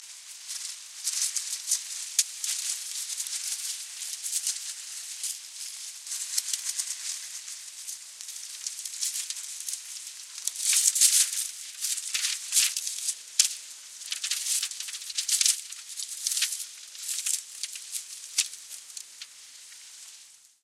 metallic ruffle
the recording of wind through the plastic at a building site was a pleasant mistake
site, wind, rain, building, plastic